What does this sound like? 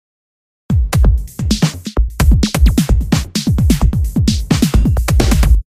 sample loop song